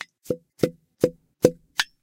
Opening Small Diploma tube